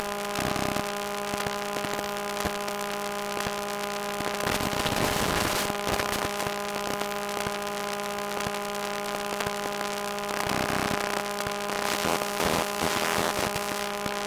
Phone transducer suction cup thing on various places on the laptop while running, opening windows, closing windows, etc.
transducer, buzz, electricity, magnetic, electro, hum